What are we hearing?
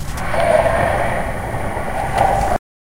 Bewitched. Capitalism is a kind of a sorcery, a brute possession and production of interiority, dependent upon spells to hold those it exploits under its sway. A capitalist is a ghost, an apparition clinging to the body of its workers; a parasite that leeches the life force required to sustain itself from the bodies of the rest of us.
Apparition was recorded with a Tascam DR100mkii in Santa Cruz, CA
field-recording, drum-kits, sample-pack